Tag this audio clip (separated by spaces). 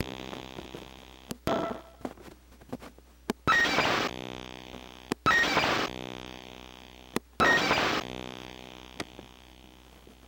ambeint circuit-bent circuits electro glitch noise slightly-messed-with static-crush